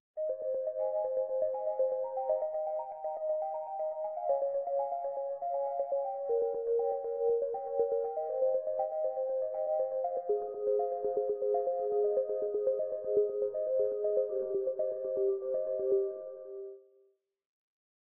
ARPS B - I took a self created Bell sound from Native Instruments FM8 VSTi within Cubase 5, made a little arpeggio-like sound for it, and mangled the sound through the Quad Frohmage effect resulting in 8 different flavours (1 till 8). 8 bar loop with an added 9th bar for the tail at 4/4 120 BPM. Enjoy!